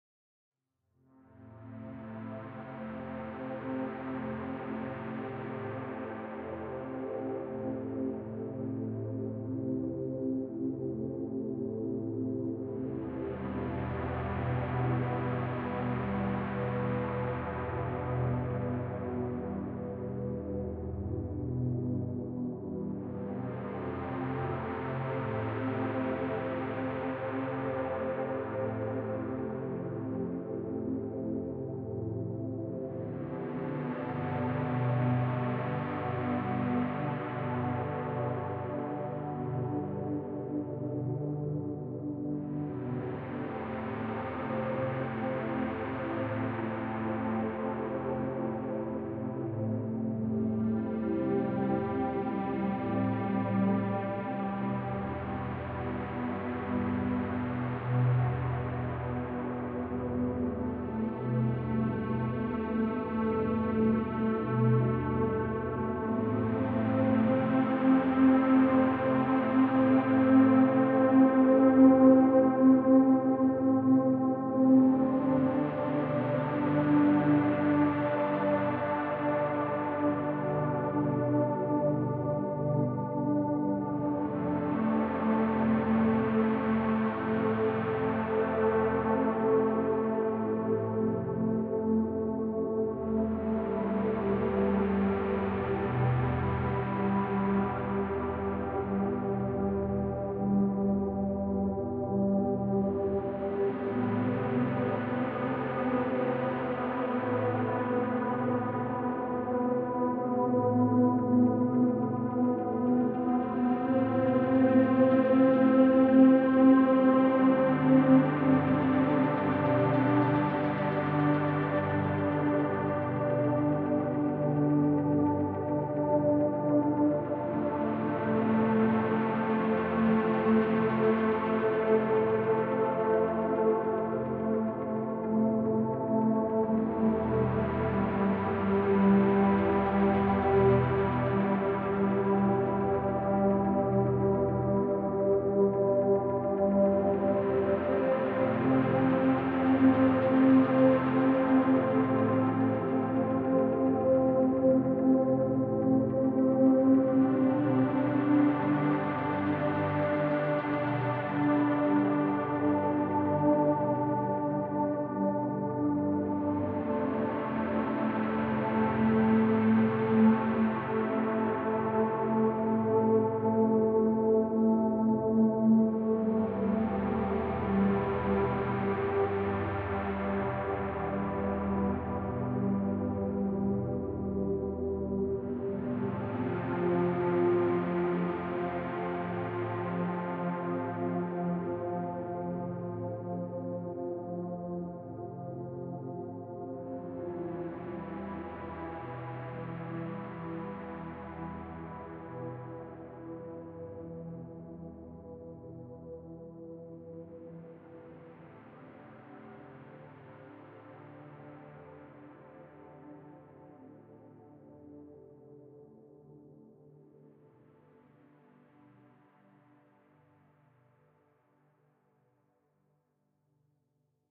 Pad, Ambient
atmosphere, background